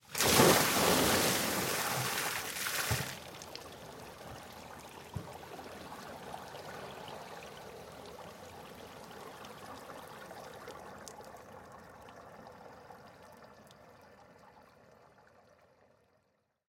Water Falling Splash